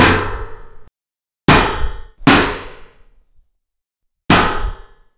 080103-008 sner iron
iron snare recording in H4n ZooM at iron box car